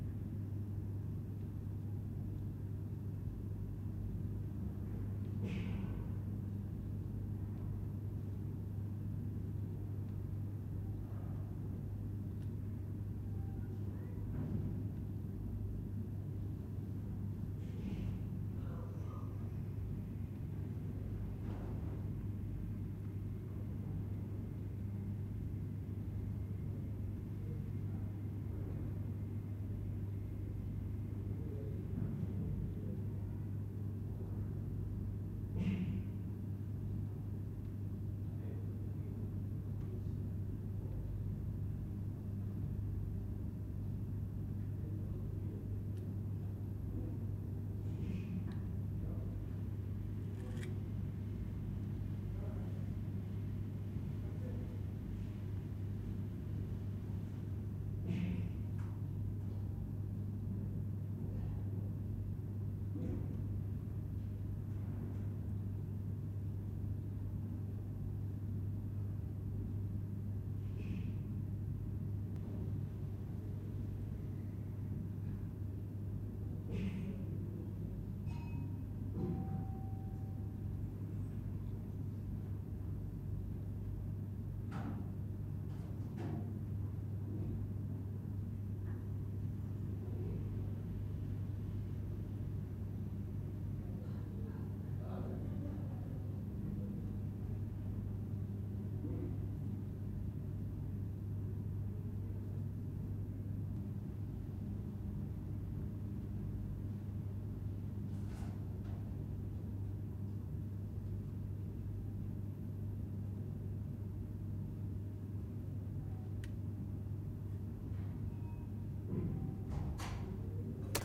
Room tone pasillo Hotel.L
The left side of this room tone ambient recorded with a pair of Schoeps condenser mics in A-B way.
hotel, indoor